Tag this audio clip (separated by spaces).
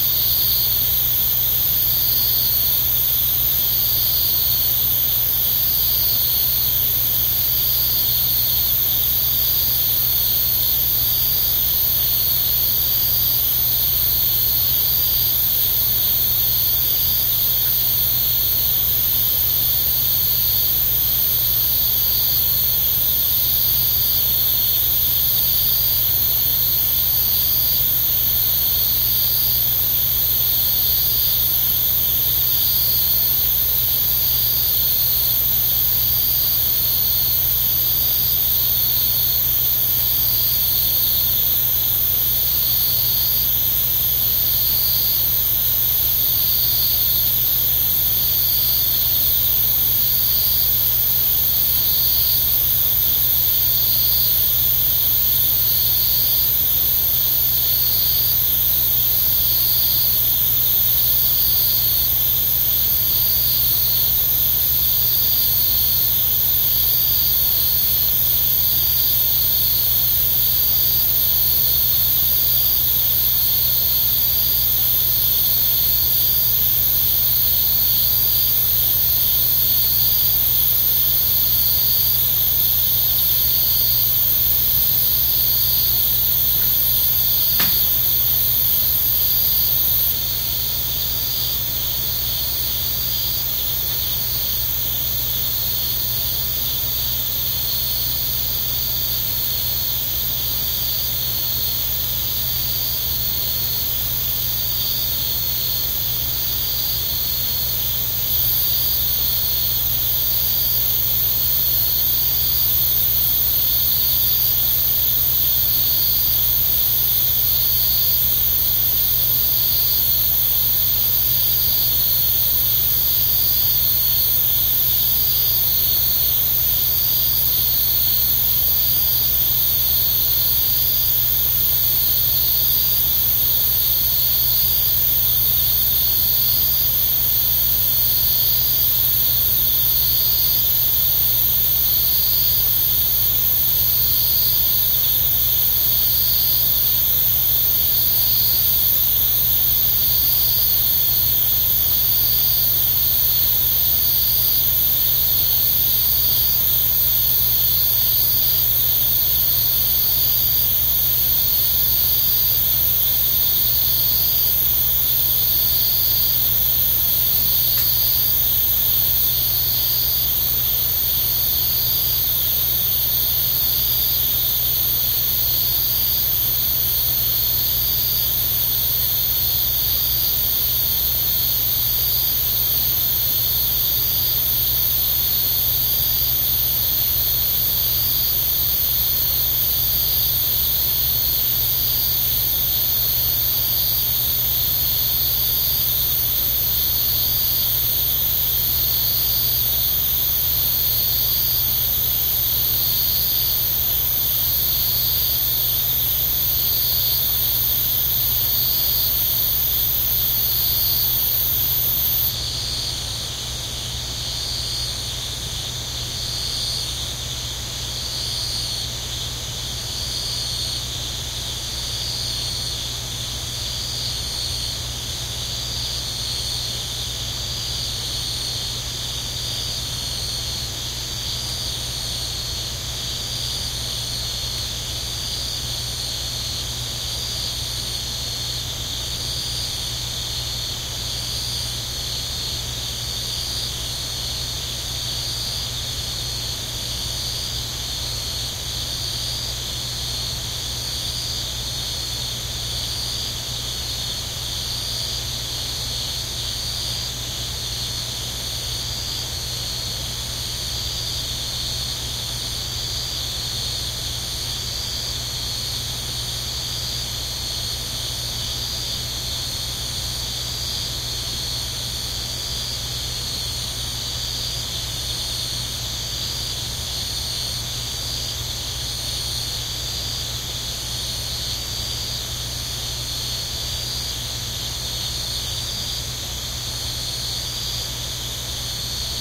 birds ambience animals car-passing ambiance rural engine garden meadow-land calm ambient farm nightly tractor farmland farmwork cicadas country japan night nature atmosphere rain guesthouse rainy field-recording countryside crickets farmhouse